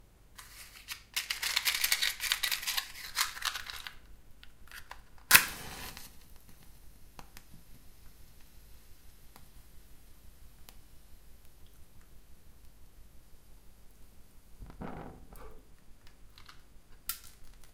Strike a match
Striking a match, blowing it out.
strike, match-box, match, burn, flame, light, sulfur, friction, blow-out, phosphorus